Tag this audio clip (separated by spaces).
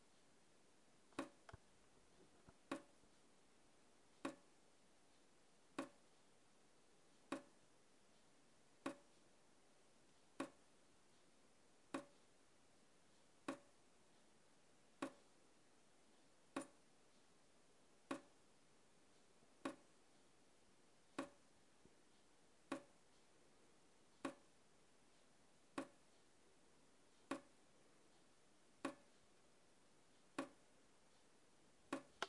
kitchen water field-recording dripping wet laundry drops drip zoom drips h4n tap